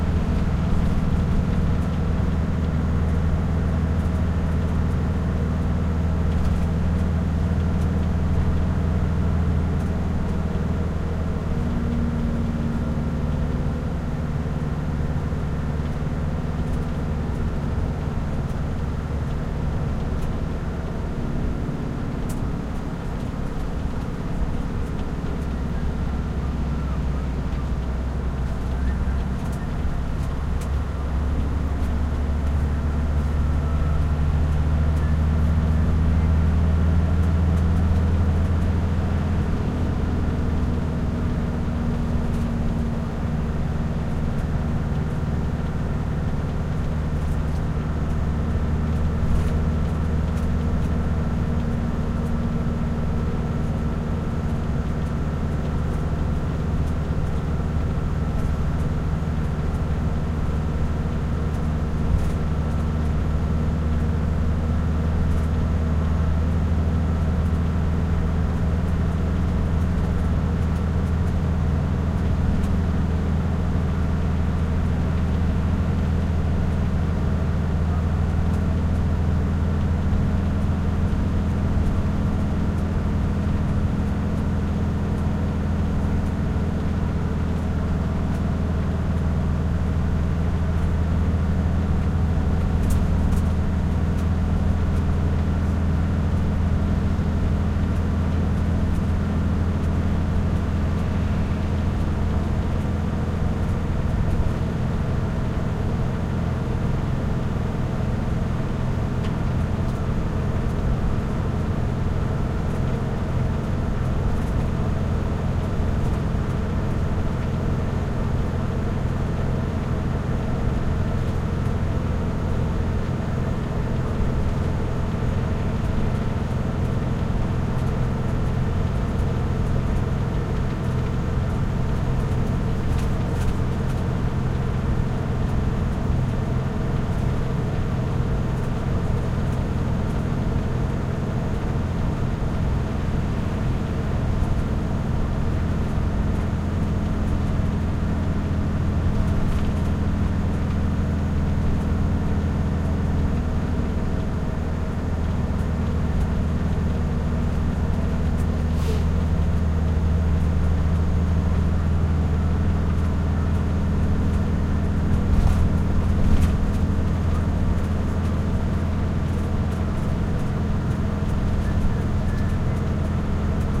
Thailand truck van int driving consistent fast speed boomy tone
Thailand, driving, truck, int, van, fast, field-recording